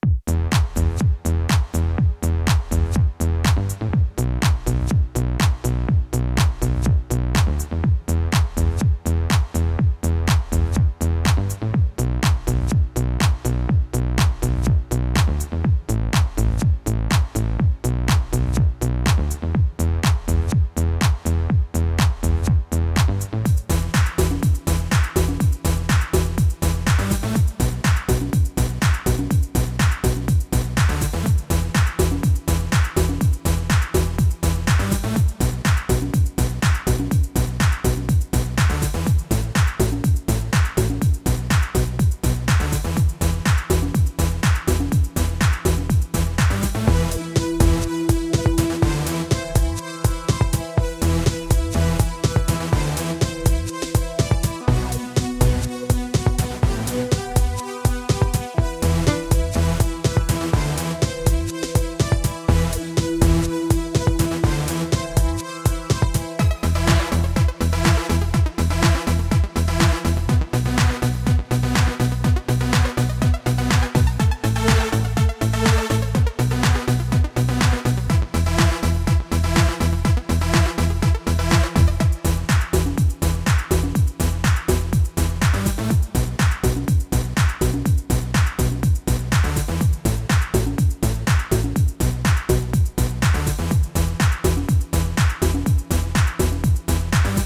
Burn the Disco Down 130bpm
I created these perfect loops using my Yamaha PSR463 Synthesizer, my ZoomR8 portable Studio, and Audacity.
drums, Loop, groove, synthesizer, bpm, pop, dubstep, beats, rock, bass, music, guitar